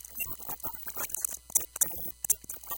vtech circuit bend062
Produce by overdriving, short circuiting, bending and just messing up a v-tech speak and spell typed unit. Very fun easy to mangle with some really interesting results.
speak-and-spell, broken-toy, music, digital, noise, circuit-bending